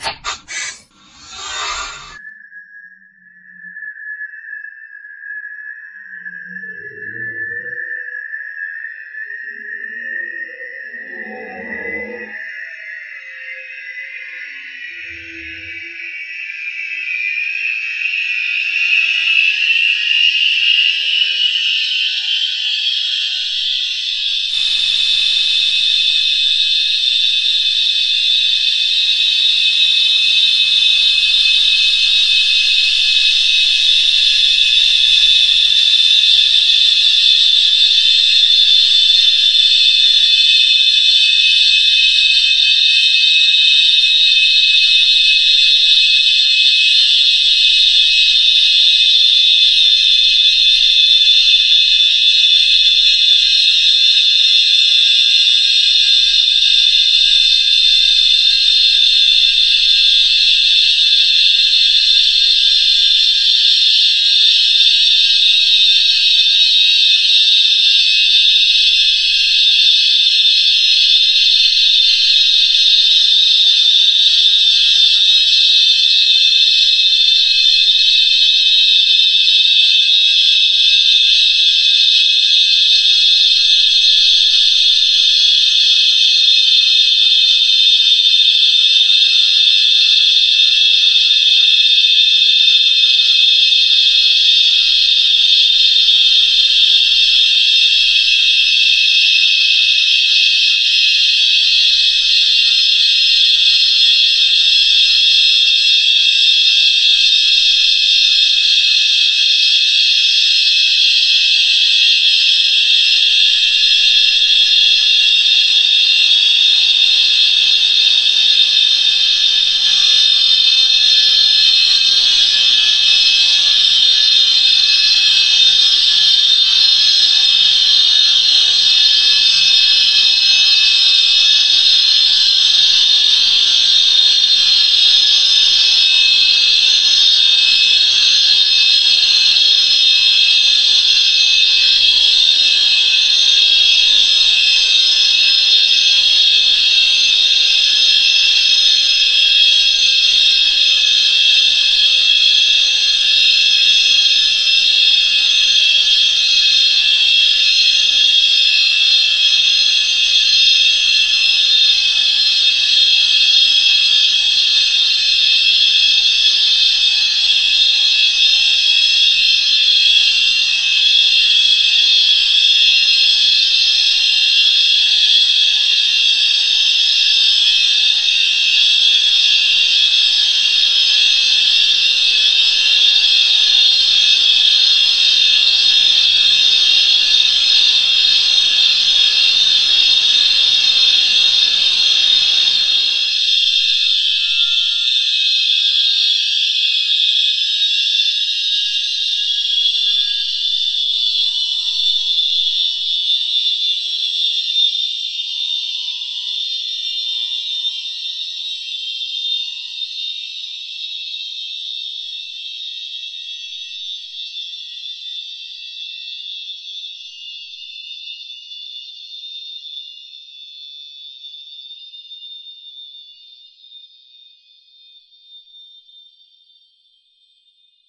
Alien Turbine Long
I made this using wavpad, my voice and a clothes pin for the starter relay sound effect.
Alien-Power-Up, Alien-Turbine-Start-Run, Alien-Turbo-Thruster